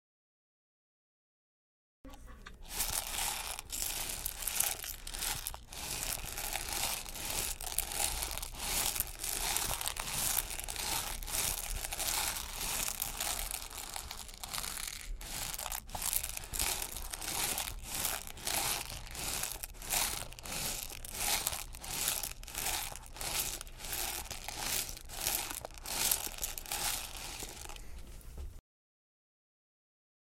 Arroz-passos-terra-cascalhos-mastigando